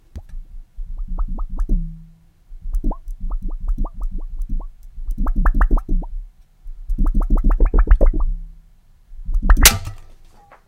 This is a blank CD wobbling then breaking.